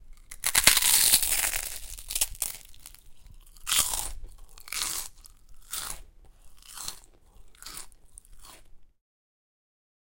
Prawn Cracker Crunch
Eating a prawn cracker. Munch munch.
bite, chew, crisp, prawn